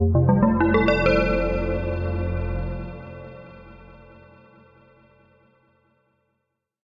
ReactOS shutdown
Another more mellow sound to use when shutting down ReactOS
down, jingle, ReactOS, shut, shutdown, tune